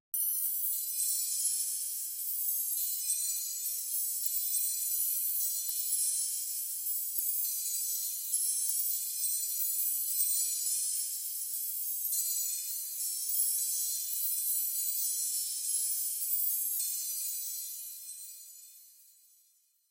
Yet another sparkling sound!
fairy, spell